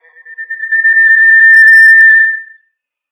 microphone feedback8
A Blue Yeti microphone fed back through a laptop speaker. Microphone held real close to invoke feedback. Sample 3 of 3, medium pitch shifted down.
squeal, microphone, harsh, oscillating